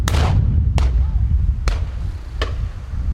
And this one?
Several shots very windy conditions5
Several shots taken from a over-and-under shooter during Pheasant shoot in very windy conditions in a deep valley.